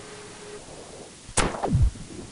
sample exwe 0198 cv fm lstm 256 3L 03 lm lstm epoch11.23 1.6638 tr
generated by char-rnn (original karpathy), random samples during all training phases for datasets drinksonus, exwe, arglaaa
char-rnn, generative, network, recurrent